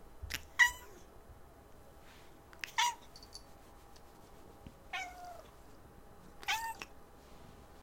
cat chirp
Queen Felicia is very comfy in my lap and makes tiny contented chirping sounds.